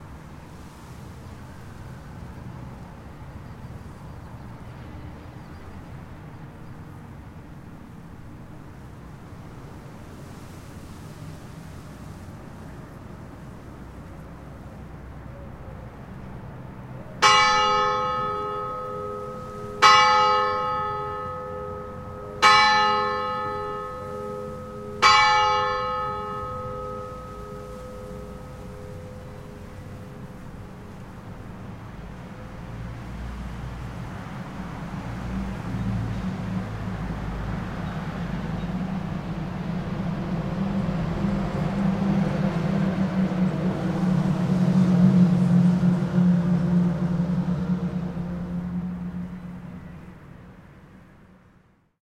Recording of the churchbell of Desteldonk striking 4PM. Typical sounds you hear in the recording is the distant traffic, and the occasional heavy transport passing by. This church lies very close to the R4 ringroad of Gent.
This recording was made with a Sanken CS3e on a Roland R-26.